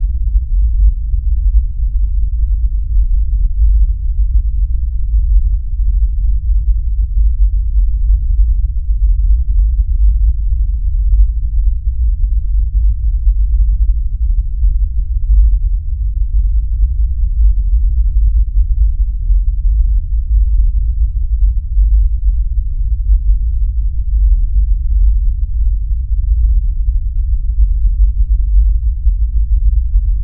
Another very low rumbling Bass...like in the movies